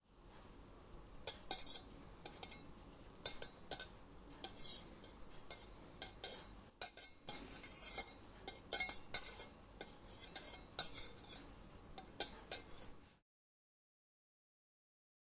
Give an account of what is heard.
Using cutlery.
Recorded on Zoom H4n.
Close perspective, inside.